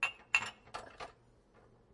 short hits, ceramic clinking together
ceramic, clink, cups